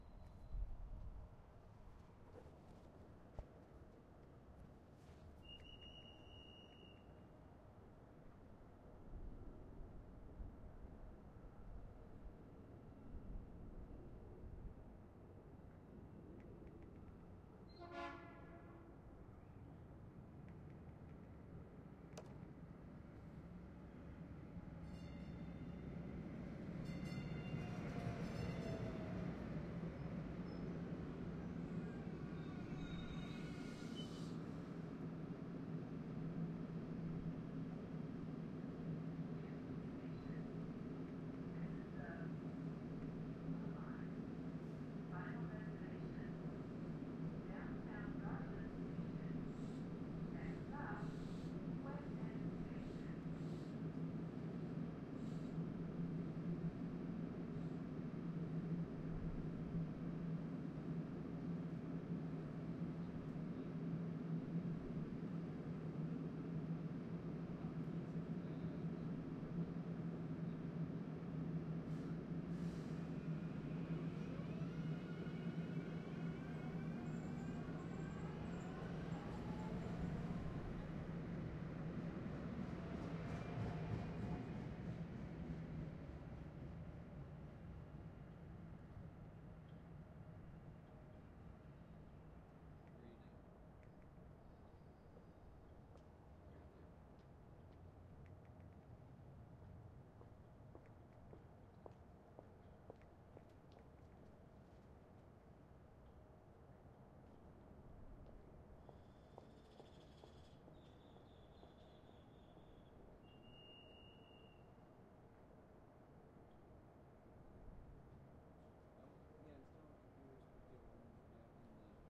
Part of the Dallas/Toulon Soundscape Exchange Project
Date: 4-5-2011
Location: Dallas, Union Station near tracks
Temporal Density: 3
Polyphonic Density: 3
Busyness: 2
Chaos: 2
footsteps, quiet, train, train-horn, train-station, whistle